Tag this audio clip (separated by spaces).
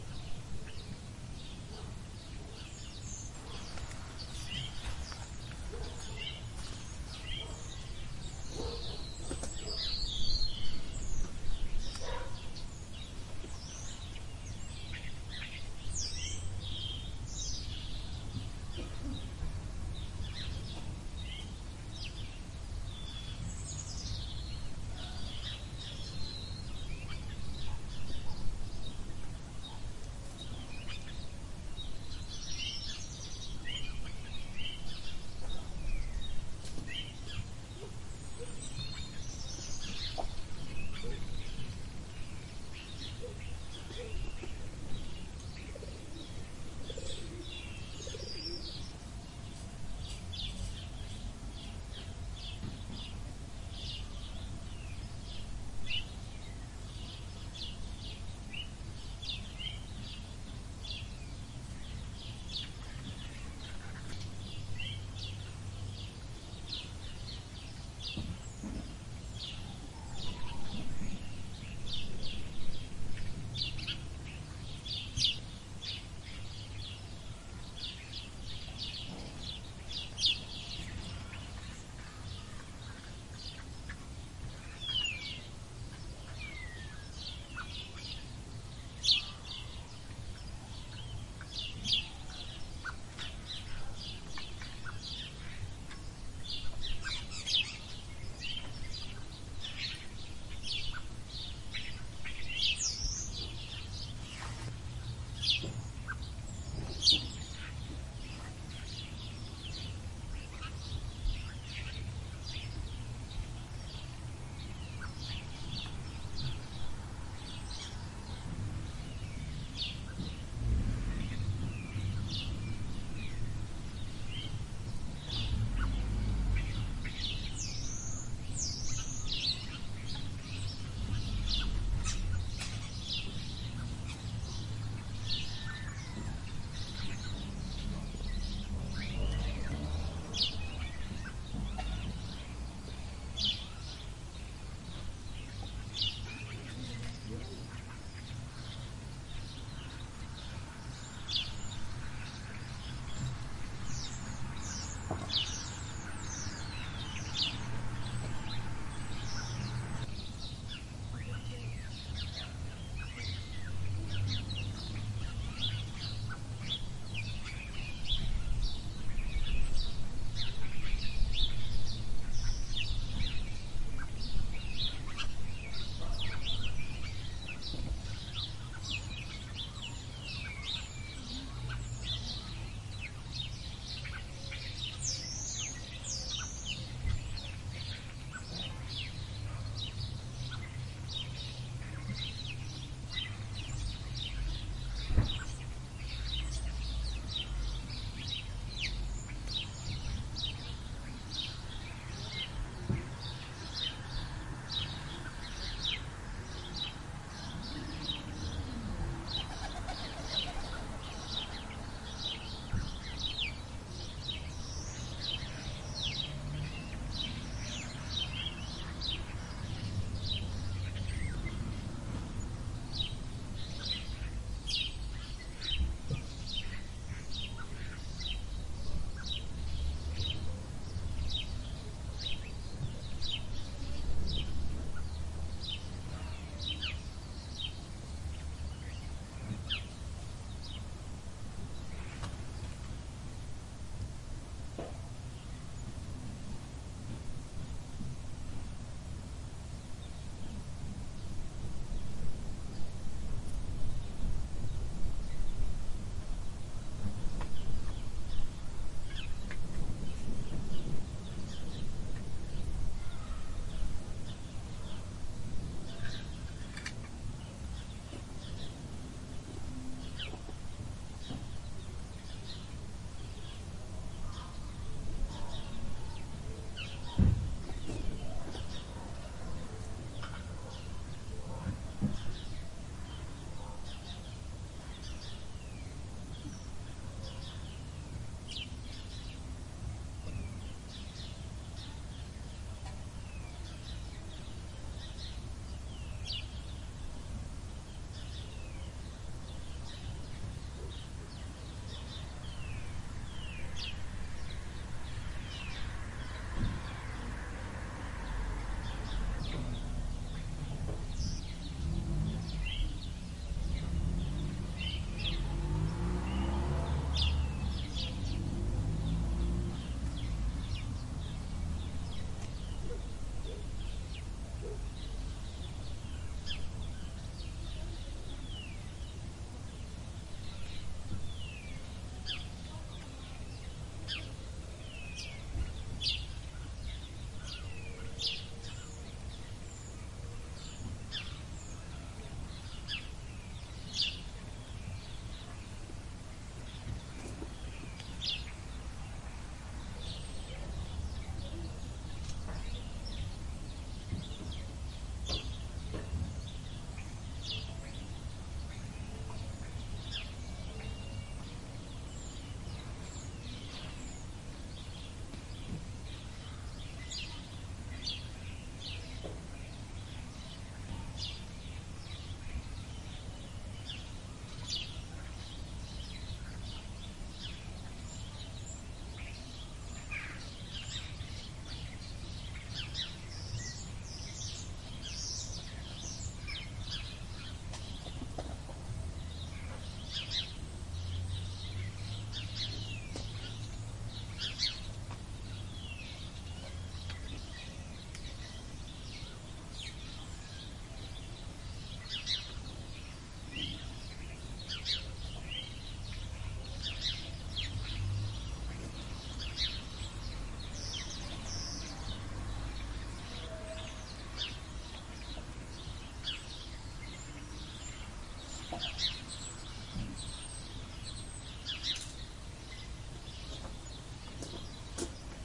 March field-recording Early-spring-UK blue-tit Mid-morning sparrows